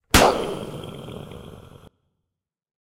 Hecho con globo, chaqueta y sonidos de la boca
Weapon, Battle, War, Explosion, Combat, Foley